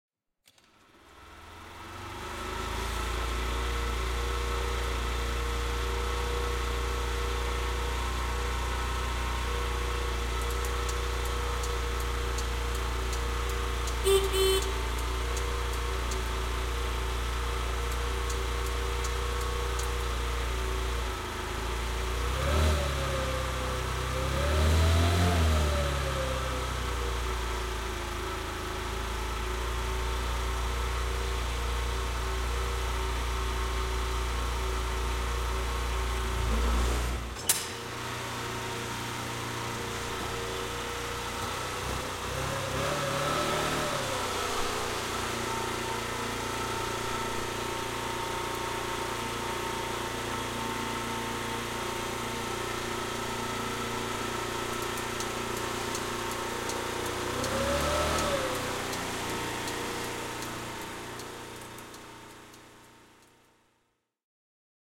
08 ambient motocycle
Sound of motorcycle
CZ, Czech